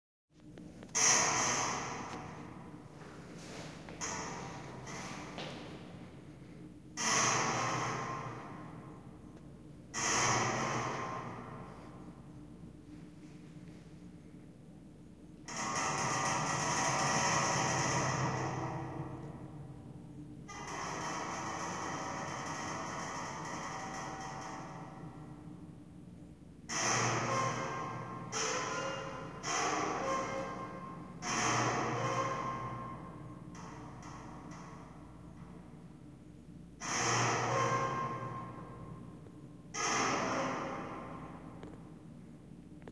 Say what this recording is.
Creaking Metal
The sounds of metal creaking
Creak, Creaking, Metal